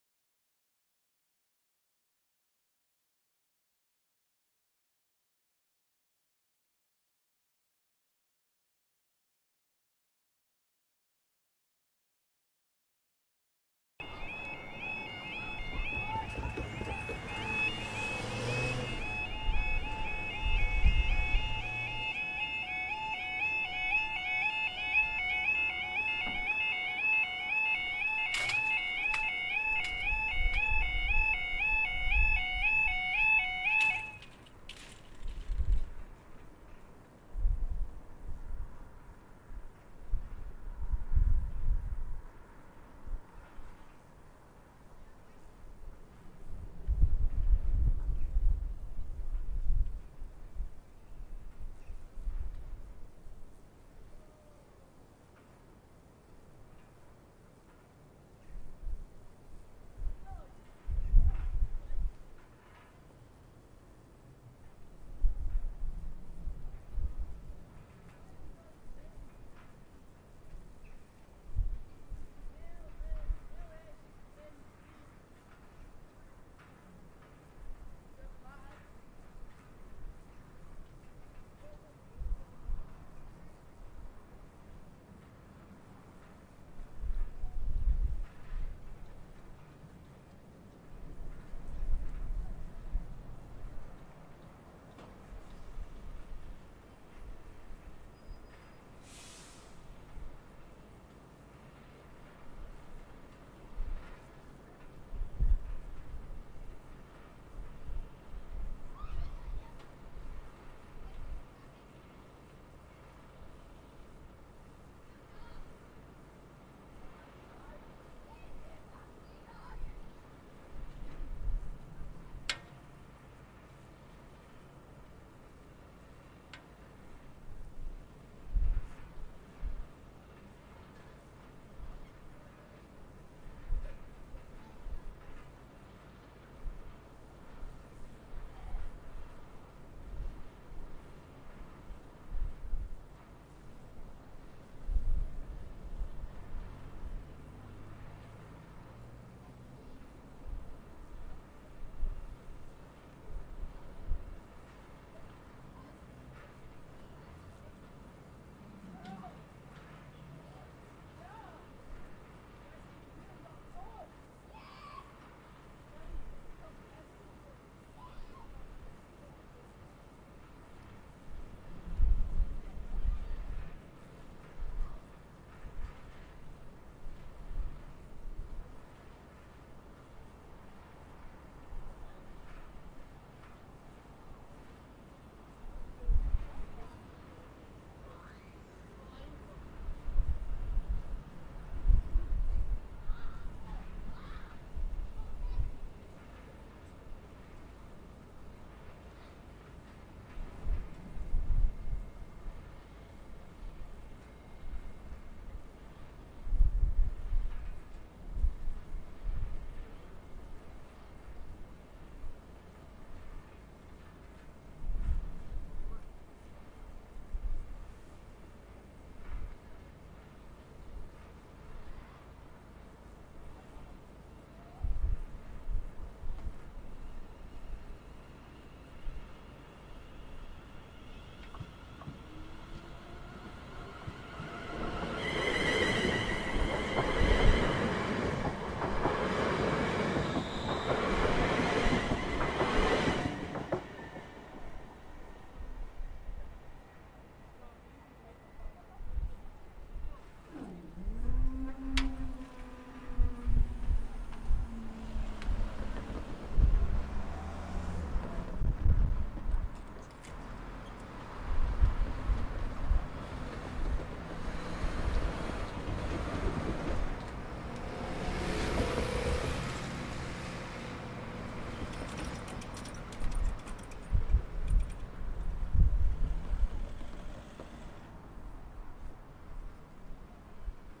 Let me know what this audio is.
recorded at railway level crossing in Stevenston. North Ayrshire, Scotland. Barrier closing alarm is heard at the beginning of the recording. Near the end a passenger train passes and shortly afterwards the barriers go up. There is a hum from the high voltage overhead cables that power the trains